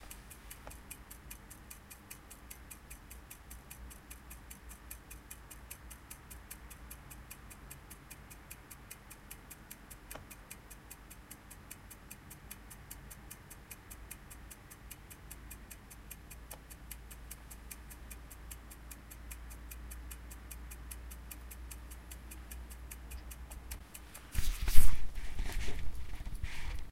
Large mechanical clock ticking (Airplane Cockpit clock, Russian MIG).